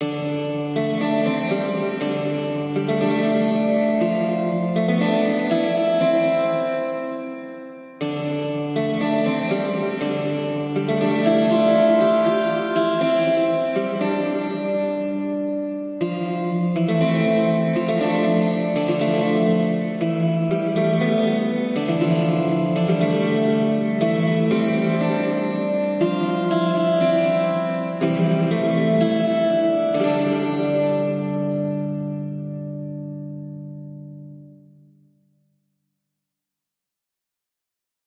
tale of bouzouki
A short acoustic romantic melody suitable as a soundtrack or as a musical introduction.
acoustic, bouzouki, chill, classic, cozy, guitar, kind, melody, memory, old, relax, relaxing, rhytmic, romantic, soundtrack, story, string, strings, tale, vintage